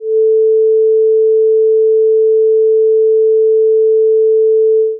sawtooth-440Hz
sawtooth waveform of frequency 440Hz of 5 seconds generated with Audacity.
waveform, 440Hz, A4, sawtooth, sysnthesis, electronics, synth